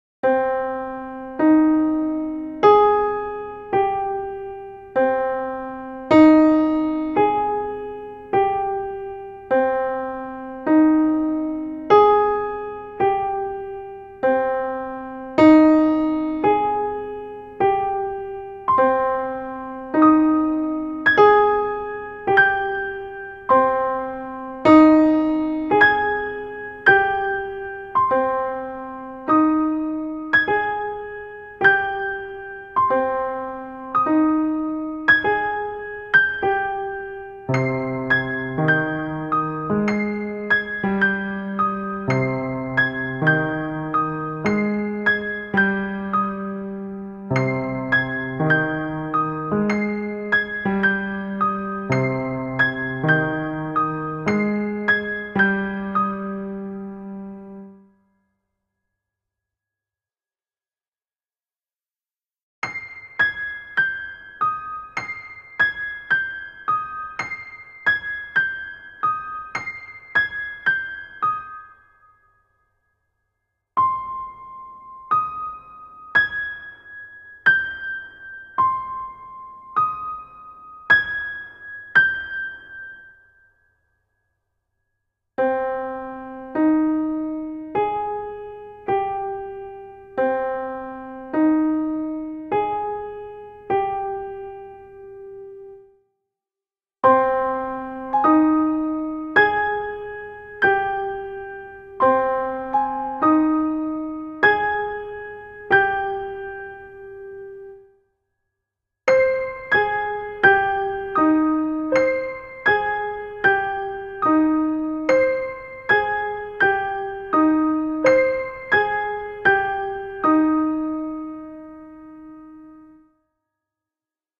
Sad piano
This was created when I was messing around in garageband on Ipad.